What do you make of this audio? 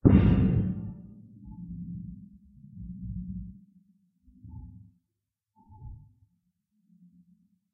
tin plate trembling
plate, tin